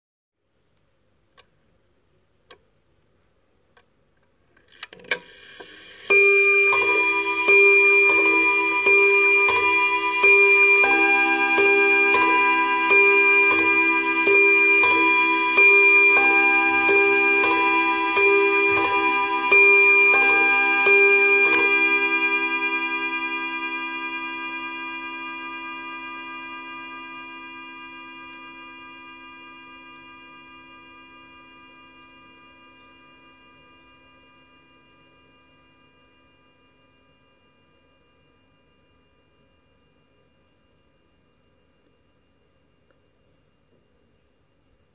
Antique table clock (probably early 20th century) chiming twelve times.